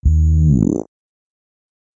squelch trance prog
attempt to recreate a sound often heard in prog psytrance made with serum and some effects in ableton